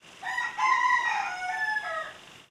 20160721 cock-a-doodle.60
Rooster crowing. Audiotechnica BP4025 > Shure FP24 preamp > Tascam DR-60D MkII recorder. Recorded near Madrigal de la Vera (Cáceres Province, Spain)
field, morning, kikiriki, hen, rural, summer, cock-a-doodle-do, rooster, field-recording, farm, nature